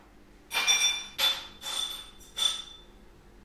ice cubes in glass
4 ice cubes falling in a tumbler